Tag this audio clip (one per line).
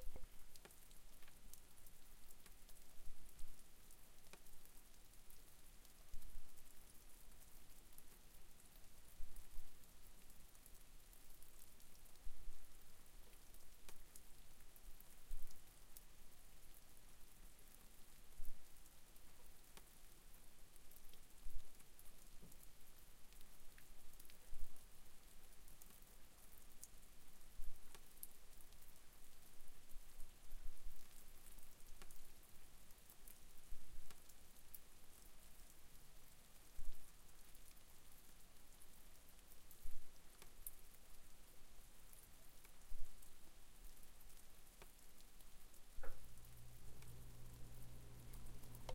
drizzle
gutter
maine
night
outdoor
rain